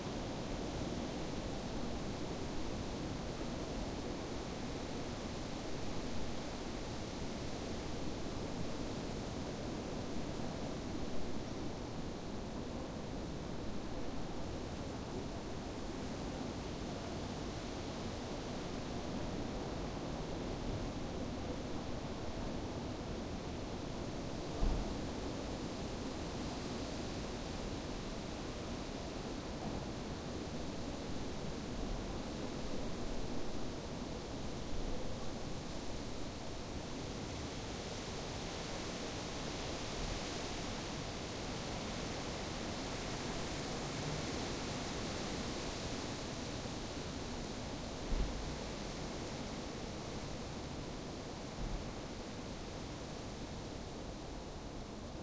Windy autumn - wind
Stormy wind on an autumn day.
If you listen carefully one can hear doors closing in the distance at 00:24 and 00:48.
autumn, storm, stormy, wind, windy